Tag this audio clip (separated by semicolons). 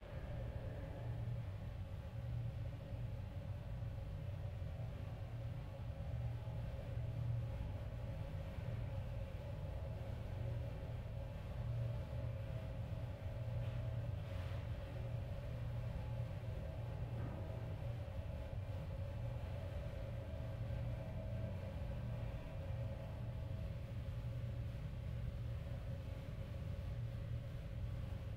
Ambience; Reverberant